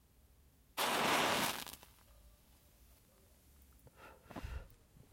Matches burning 01
Lighting a match, match burning